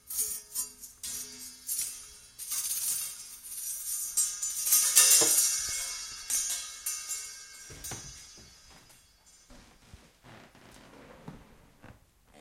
Ringing metal objects